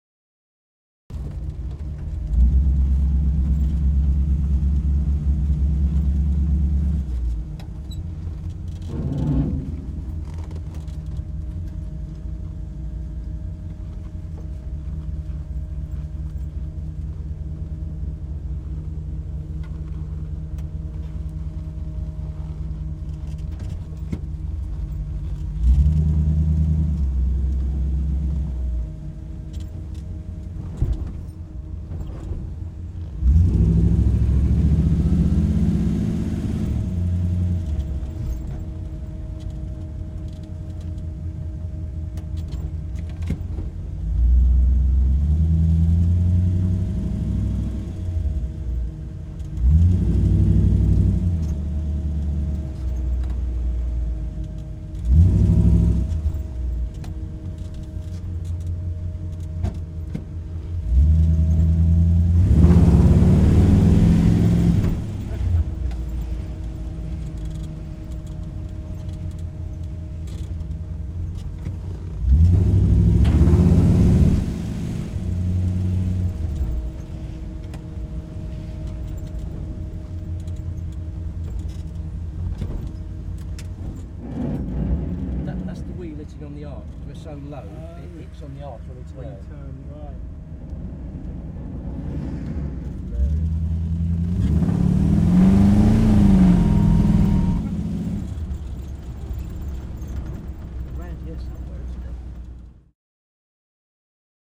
1950 Ford Mercury interior ignition and cruise with revs
Recorded on Zoom H4N with Rode NTG-3.
The sound a vintage 1950 Ford Mercury car with v8 engine cruising and revving recorded from inside. Occasional voices towards the end.
50s, cruise, rev, car, engine, drive-by, drive, mercury, ignition, 1950, auto, ford, start, vehicle, vintage, v8, hotrod, automobile